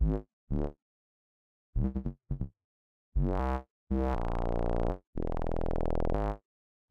an output from a home made pure data bass generator patch